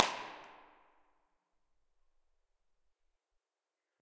boat dock IR fix 0.5x
reverb, IR, impulse-response